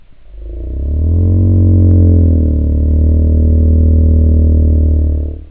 SM58 MOUTH BASS 2
Nice Tone Testing My New Mic.